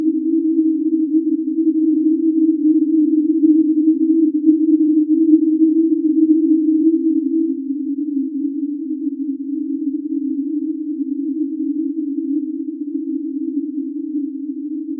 the sample is created out of an image from a place in vienna
synthesized, image, processed